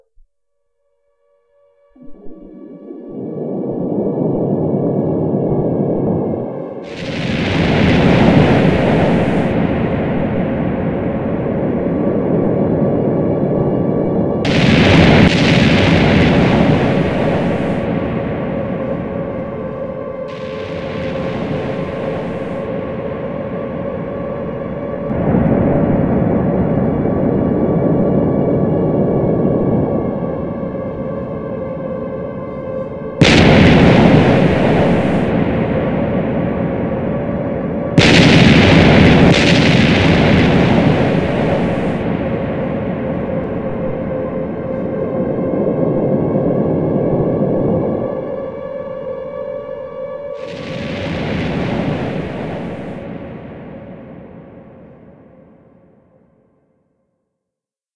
Air Raid Request
city being bombed. sorry i cant offer the siren alone look elsewhere.